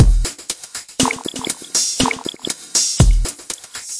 120-TimingBallad(4Beat)
Still a pack of loops (because I enjoy doing them) for the lazy ones there are also ready fillings (4Beat).
Created in Hydrogen and Microsampler with samples from my personal library. Have a good time.
korg, beat, pattern, fills, bpm, hydrogen, sample, loop, kick, library, 120, drums